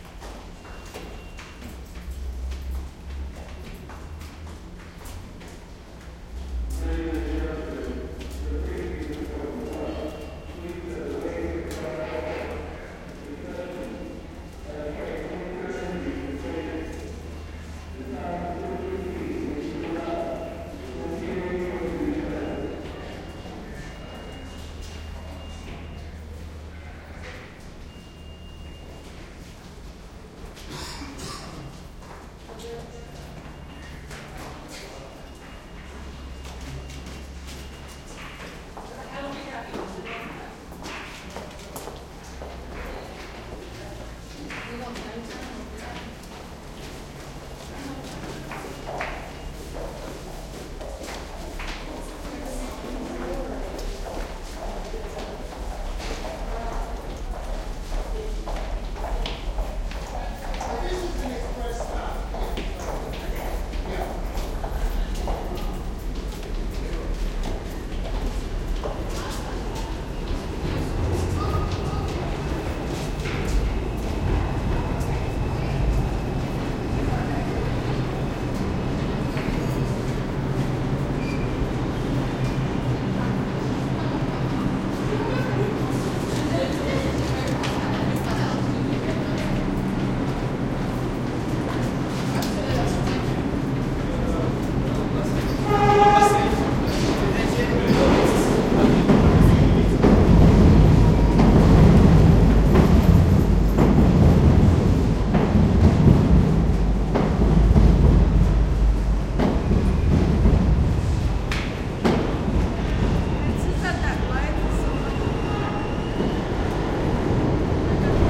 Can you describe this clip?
subway tunnel +train passby bassy NYC, USA
NYC, USA, bassy, passby, subway, train, tunnel